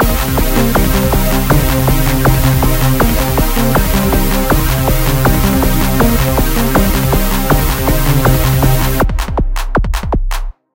loop i made in fl studio
fast-tempo, fl, loop, techno